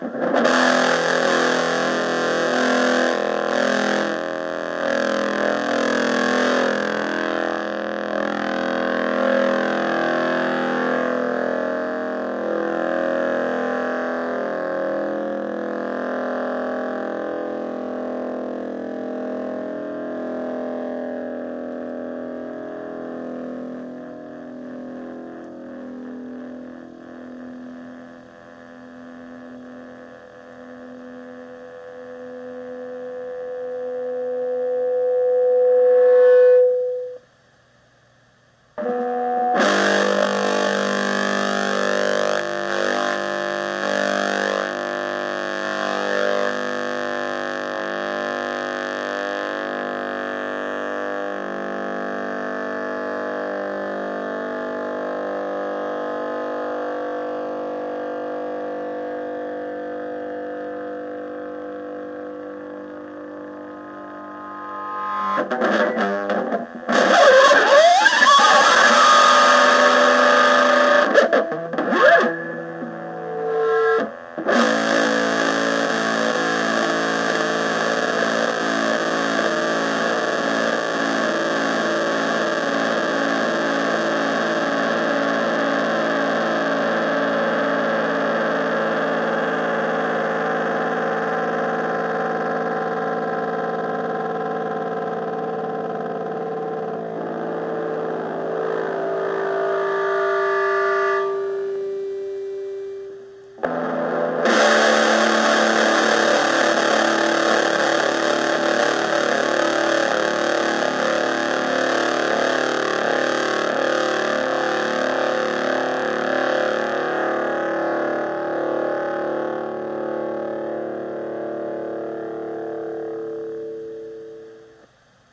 Electric Guitar chords going through a "Honeytone" miniamp with the gain and overdrive all the way up. Seek eeeeeeelectricityyyyyy.